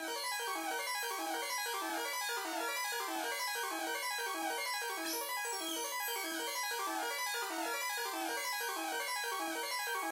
Weird synth storm
A, nexus, pan, Synth, Weird